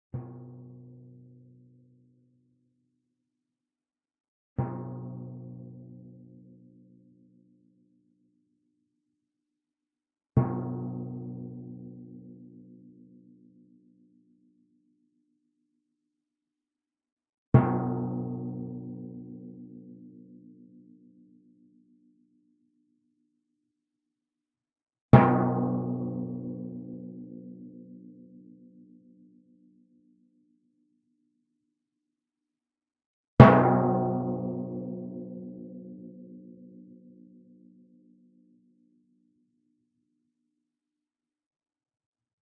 timpano, 64 cm diameter, tuned approximately to B.
played with a yarn mallet, about 3/4 of the distance from the center to the edge of the drum head (nearer the edge).
hit, percussion, timpani, drums